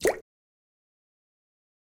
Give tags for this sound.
aqua aquatic bloop blop crash Drip Dripping Game Lake marine Movie pour pouring River Run Running Sea Slap Splash Water wave Wet

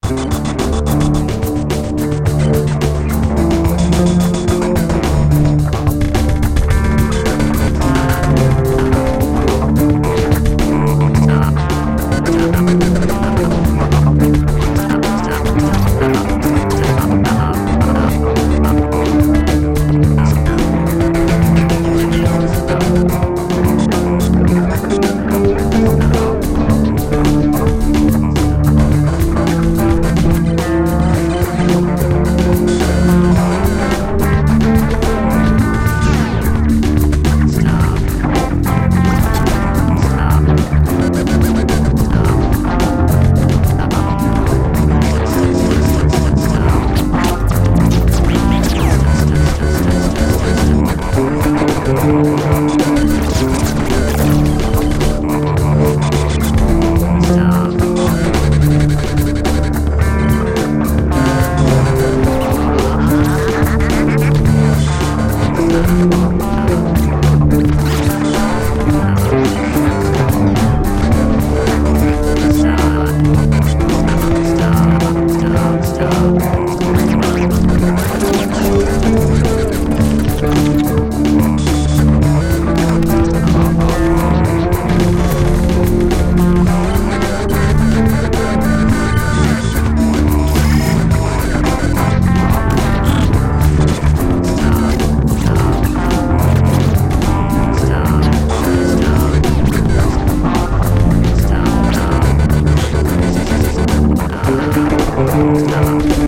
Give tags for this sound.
Voices 108 Robotic FX BPM Dub Groove

